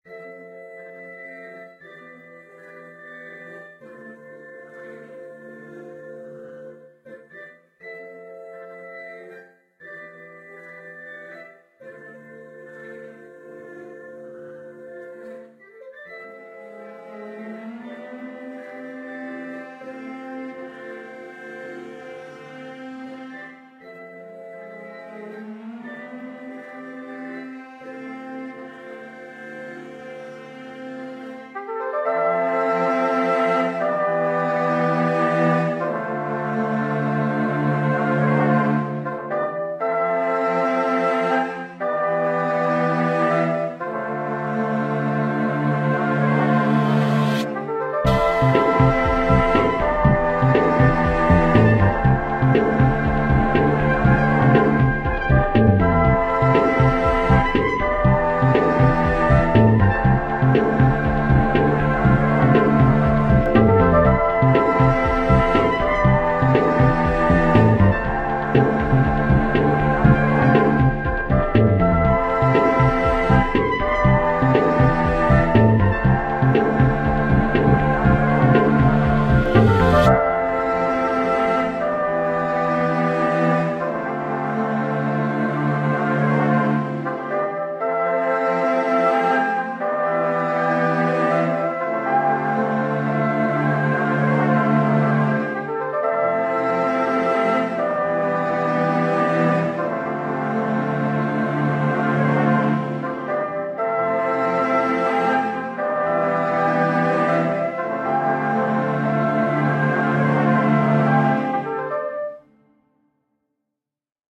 Short tune I made while messing around with music for podcasting. All made in ProTools.
Loop, mood, Ambient